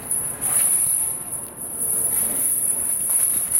Indoor recording of chains being pulled around chairs (tying up).
metal, haunted, horror, money, chains, clink, rattle, metallic